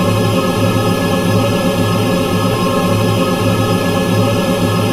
Created using spectral freezing max patch. Some may have pops and clicks or audible looping but shouldn't be hard to fix.
Atmospheric, Background, Everlasting, Freeze, Perpetual, Sound-Effect, Soundscape, Still